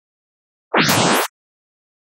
Generated with Audio Paint. Such sound, much experiment.
audio, generated, paint, sound